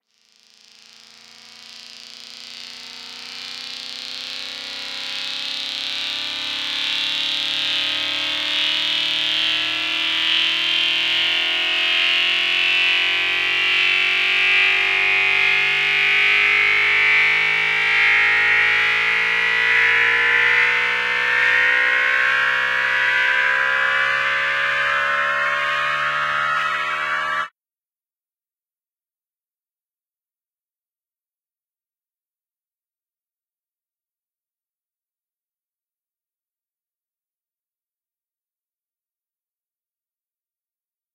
stereo resonant riser
fx, sfx, sound-design, Psy, effect, noise, digital, psytrance, synthesis, psychedelic, synth, sci-fi